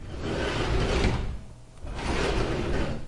A wooden sliding door opening and closing, no impact